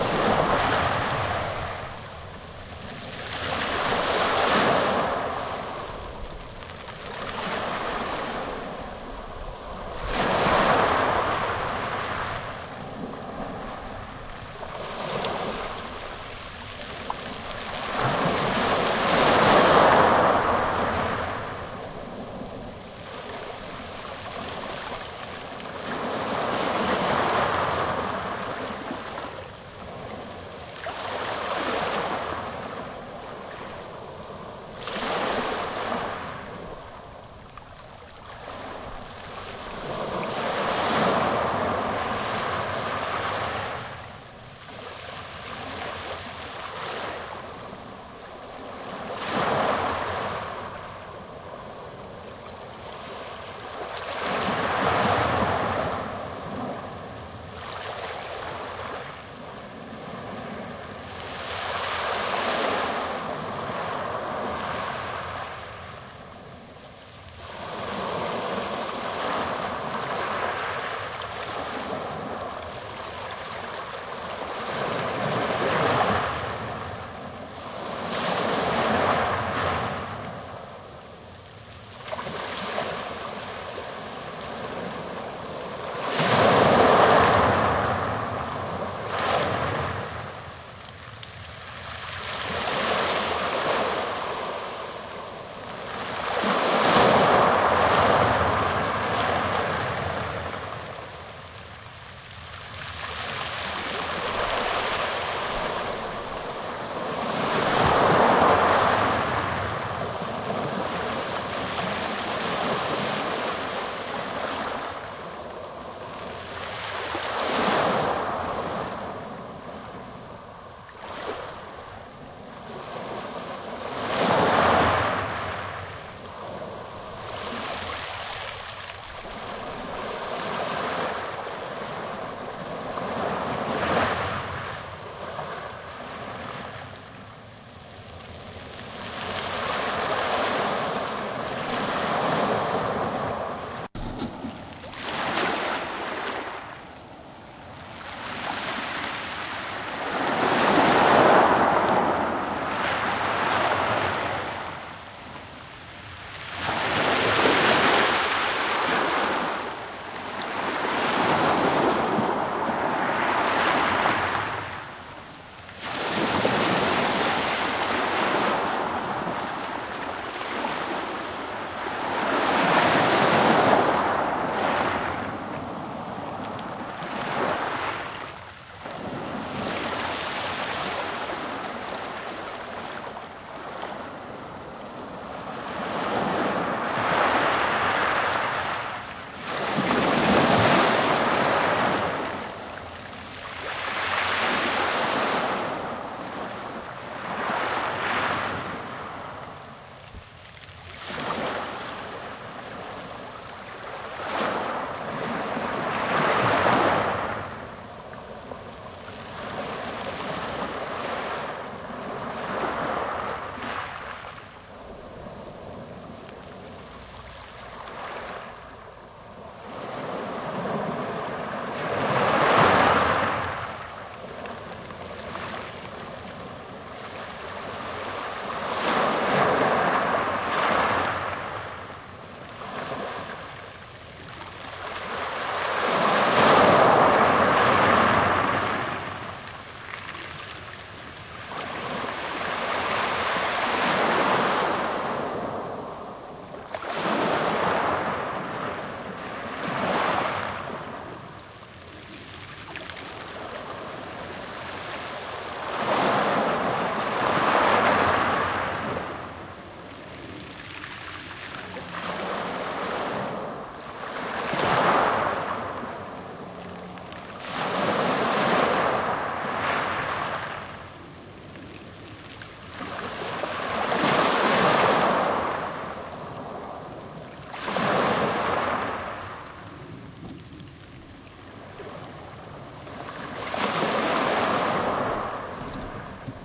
Medium sized waves Recorded sitting on a groyn (no giggling - that's the name given for a construction of wood or rock going out perpendicular to the coast to avoid erosion or LongShoreDrift)from whence i could get the gurgling of the water as well as the crashing of the small waves and the sucking and pulling of the stones. Recorded on a minidisk with an sm58 from what i recall.